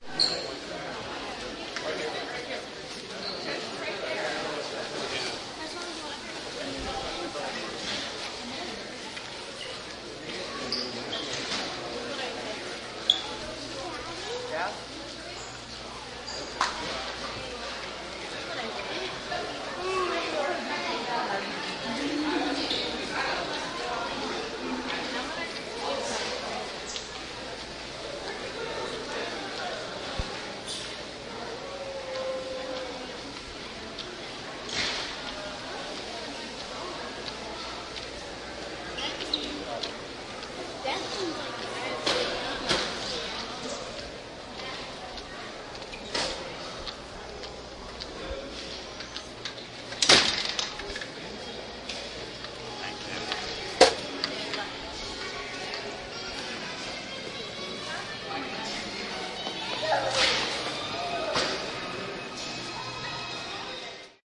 A trip to the movies recorded with DS-40 and edited with Wavosaur. Lobby ambiance after the movie.